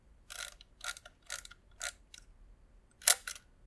Charging and shooting with an old Olympus Trip 35 camera.